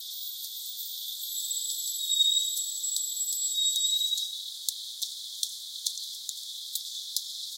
thing.call.02
high pitched sound of a truck's brakes heavily processed to resemble the call of a bat, bird or something/el ruido de los frenos de un camión procesado para parecerse al canto de un murciélago, un pájaro o algo
bat, nature, brake, call, pitch, processed, bird, animal, machine